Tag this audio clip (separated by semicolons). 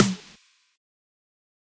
sample; bass-drum; drum